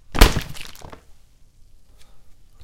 bottle hit 11
Recording of a bottle of water being thrown against my chest or into a bucket containing more bottles and water. Recorded using a Rode NT1 microphone.